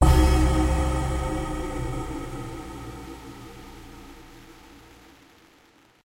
A soft chord with a metal hit
sci-fi, haunted, synth, sinister, hit, dramatic, shock, scary, suspense, horror, creepy, metal, digital, thrill, sting, terror, drama, electronic, spooky, surprise